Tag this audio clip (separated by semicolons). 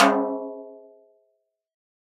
drum; multisample; velocity